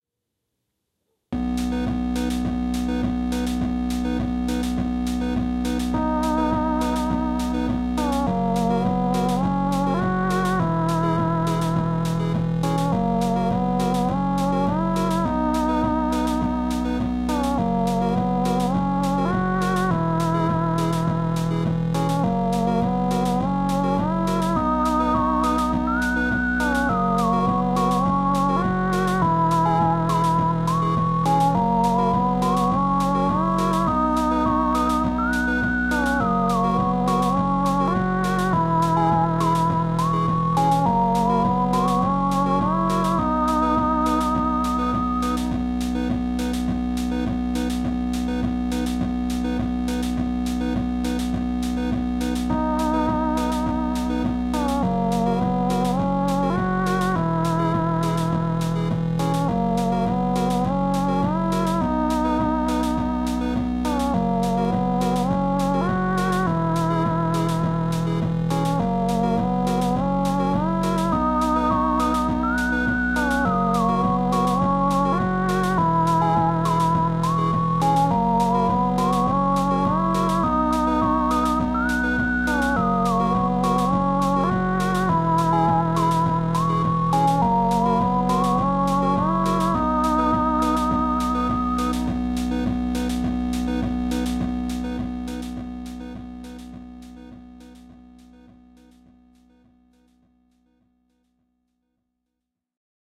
13OCT2019 ModBox Sample Recording
This recording is an organization of various loops created using ModBox.
The original ModBox project can be accessed and freely edited here:
(visible link has been shortened for ease of use, click the link to access the project)
chiptune, beatbox, retro, sample, 8bit, digital, loop, modbox, synth, melody